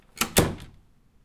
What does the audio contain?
Door Close
close, closing, doors, door, slam